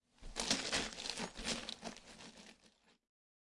crawling-broken-glass009
Bunch of sounds I made on trying to imitate de sound effects on a (painful) scene of a videogame.
sound-effect, crawling, glass